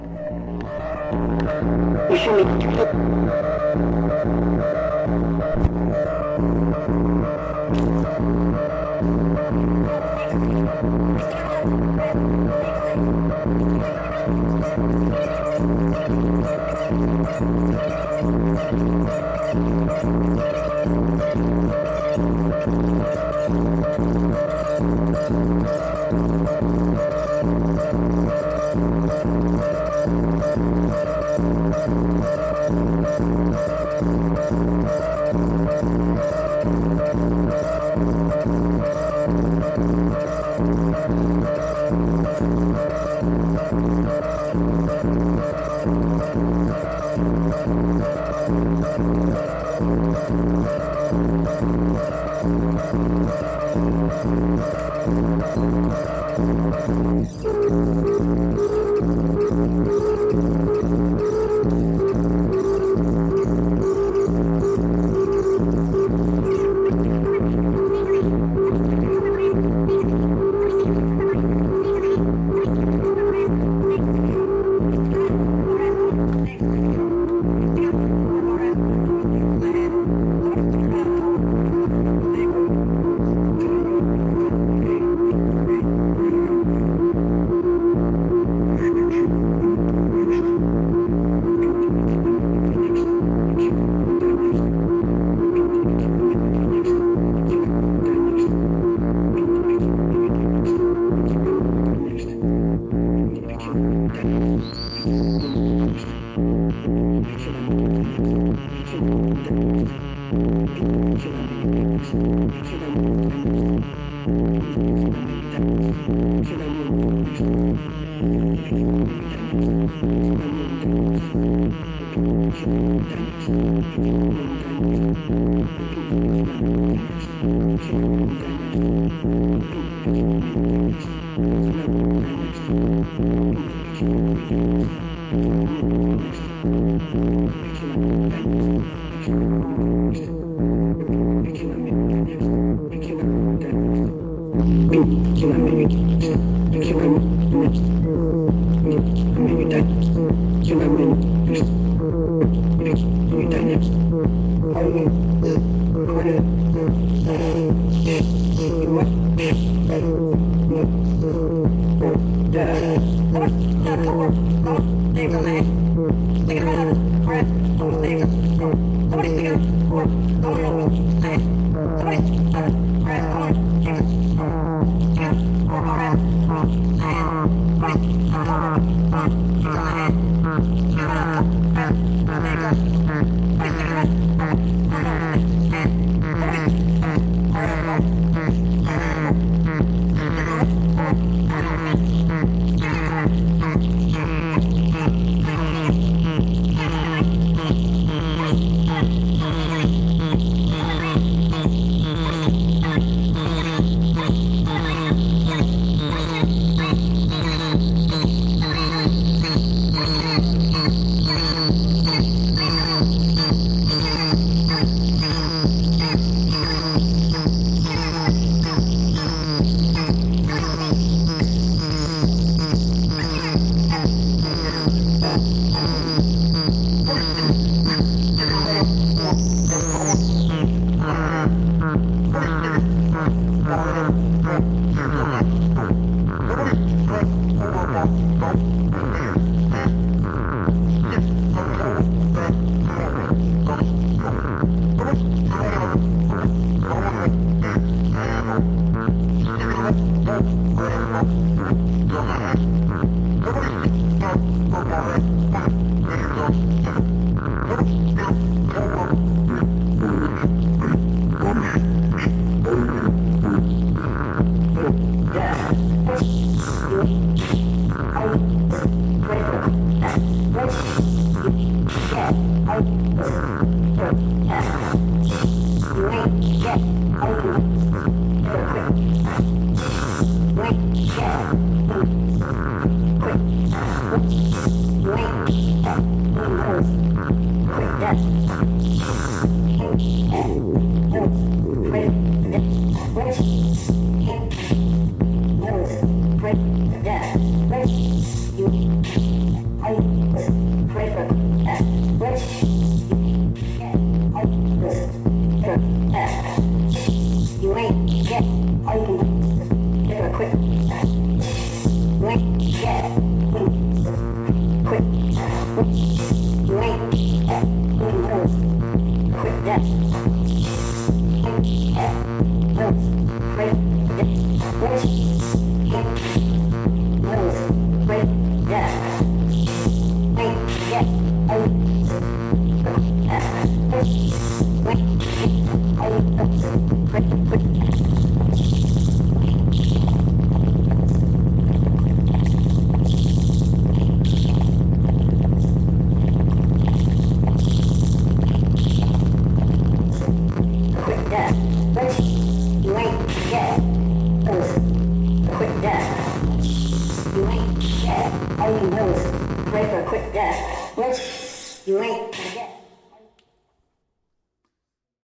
Artropocode: Jorge Barco noise session
+info